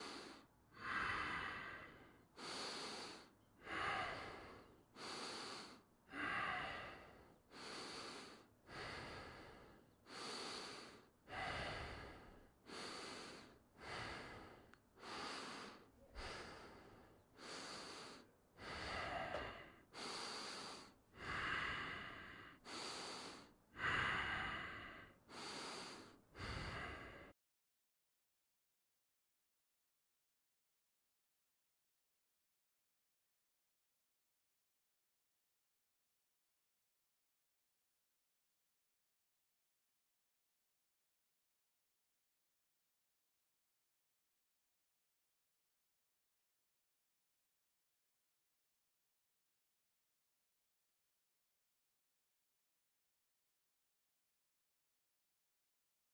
heavy breathing
breathing, OWI, sounds, hard